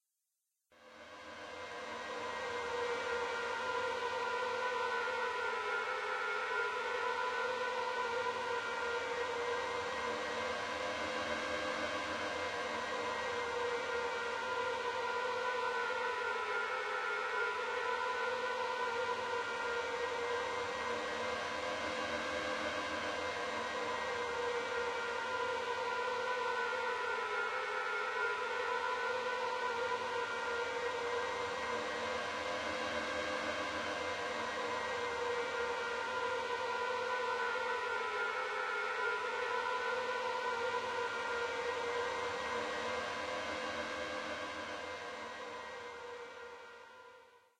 Nightmare-Level Unsettling Horror Suspense
Make your audience goose bumpy by adding extra suspense.
Distorted sampled orchestra in the background, echoing and looping through an unsettling pattern.
dramatic, phantom, scary, suspense, haunted, nightmare, creepy, spooky, terrifying, anxious, orchestra, thrill, sinister, macabre, horror, terror